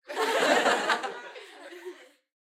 AF Crowd Small Laugh 3
a short chuckle from a crowd
laugh, chuckle, crowd, audience